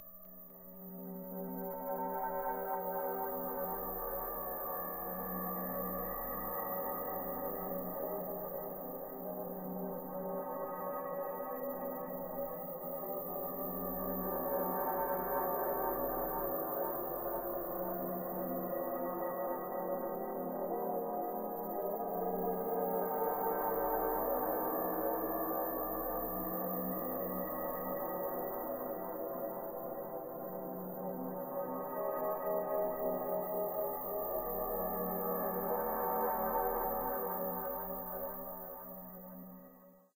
Forbidden Planet 1
A collection of Science Fiction sounds that reflect some of the common areas and periods of the genre. I hope you like these as much as I enjoyed experimenting with them.
Alien, Electronic, Futuristic, Machines, Mechanical, Noise, Sci-fi, Space, Spacecraft